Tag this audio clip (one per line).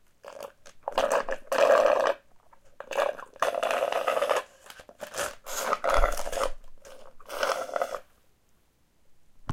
aip09
food
ice
straw
water